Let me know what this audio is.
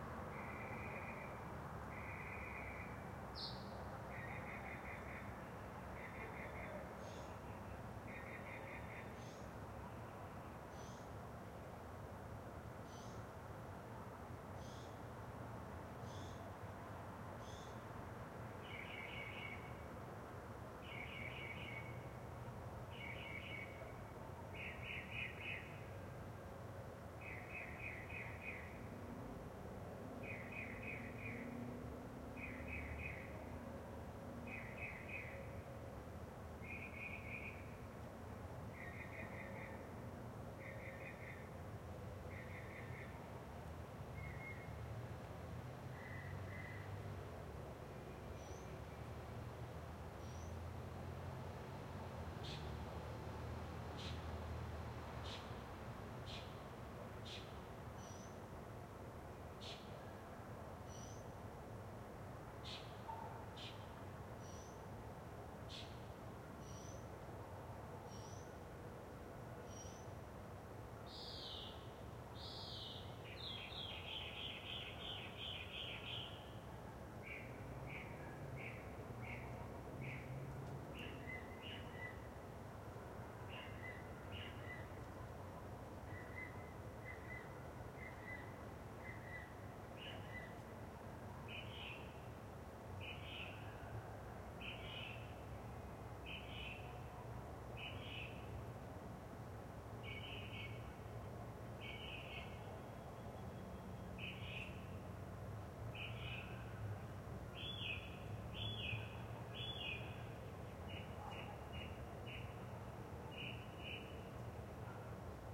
Small Town at night
Recorded at night in a small town. Cars pass by, a mocking bird sings, the occasional dog barks.
ambient, atmo, atmos, atmosphere, atmospheric, background, background-sound, birds, California, cars, field-recording, nature, noise, soundscape, suburb, suburban, town, traffic, white-noise